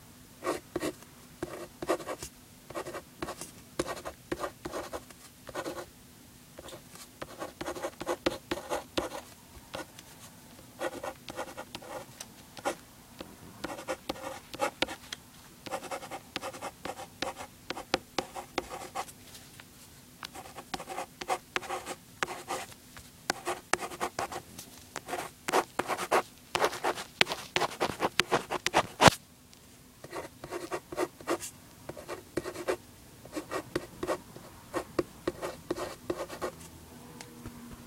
Writing-with-Pen
Just writing on a piece of paper with a biro pen.
paper, pen, scribble, write, writing